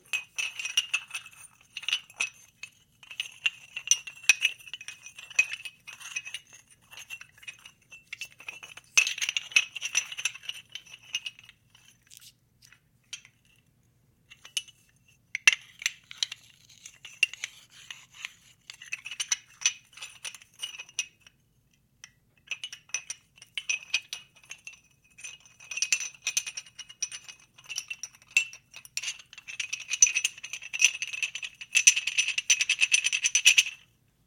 Bathroom Tiles-Handled-02
The sound of ceramic bathroom tiles being played around with in someone's hands.
Ceramic, Clang, Handle, Handled, Move, Moved, Scrape, Tile, Tiles, Tink